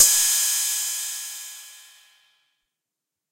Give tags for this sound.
cymbal; roland; accent; tr; cy

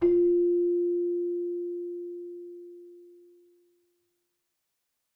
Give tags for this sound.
keyboard; bell; celesta; chimes

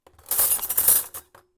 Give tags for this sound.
forks; clashing; kitchen; cutlery; knives; spoons

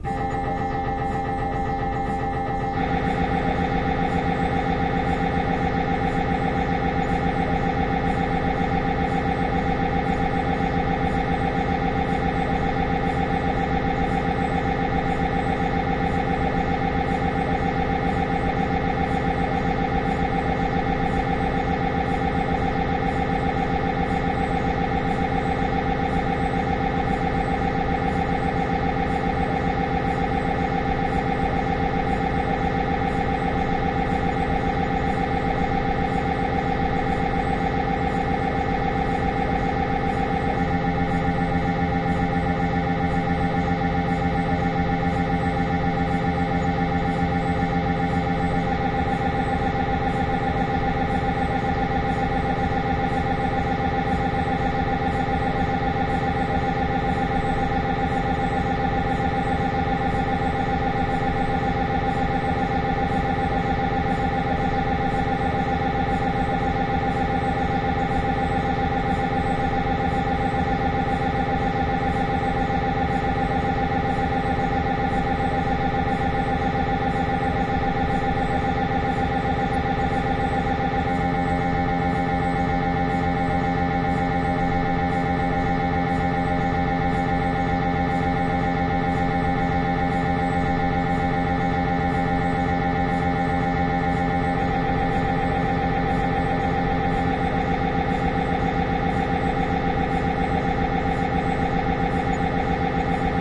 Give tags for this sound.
resonance; density

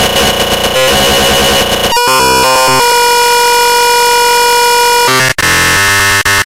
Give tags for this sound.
alien; computer; error; experimental; file; futuristic; glitch; harsh; laboratory; noise-channel; noise-modulation

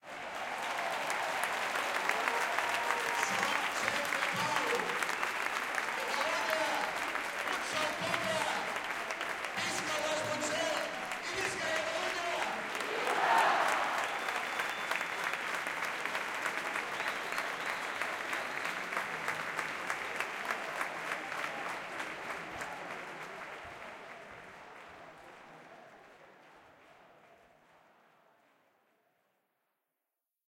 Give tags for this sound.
Clapping; peace; Sant; talking-in-Catalan; Celoni; Talking; Catalanwe; manifestation; Applause; people

